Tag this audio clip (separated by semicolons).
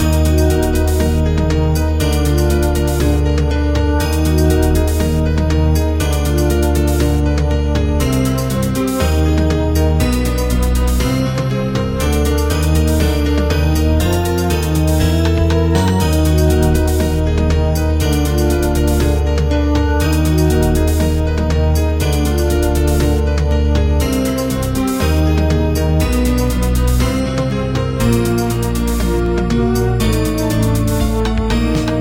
melody; games; gameloop; ingame; organ; bells; happy; tune; synth; loop; game; sound; piano; music